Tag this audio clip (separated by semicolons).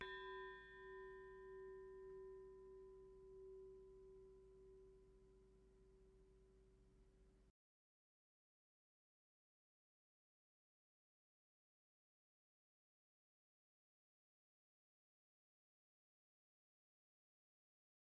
bell; hit; ring; heatsink